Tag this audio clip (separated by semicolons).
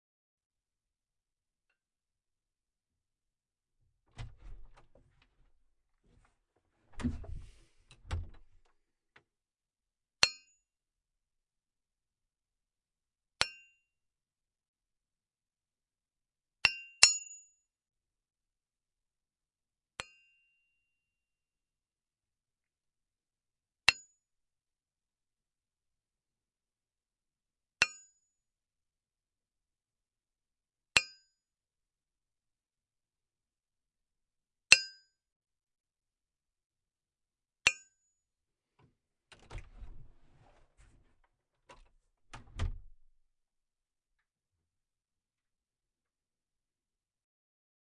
ping; isolated; clink; metal